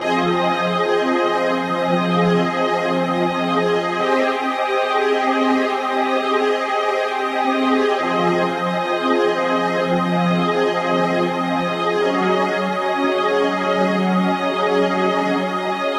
Short piece of music thought as a background to make feel the viewer-listener uncomfortable.
ambience, atmosphere, dark, music